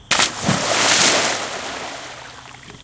I needed a sound effect of a body being thrown into a river for a play. This is a recording of me falling backwards into a pool. splash_1 is a little louder, and there is some clipping. splash_2 is a bit longer.
Recorded using internal mics of a zoom h2n.